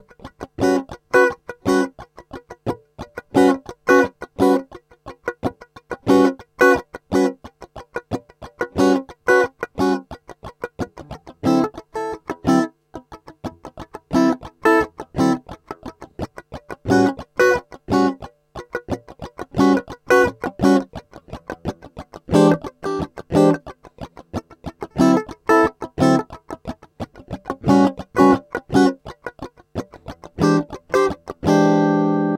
A7 12 Bar Blues Guitar Comping
I, I, I, I,
IV, IV, I, I,
V, IV, I, V
89bpm
Electric, 89bpm, Scalloped, Washburn, Strings, Acvtive, Pickups, EMG-S, EMG, EMG-89, Guitar, Elixir